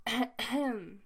A more fake sounding clearing of the throat.
throat, ahem, throat-clearing, clearing, clear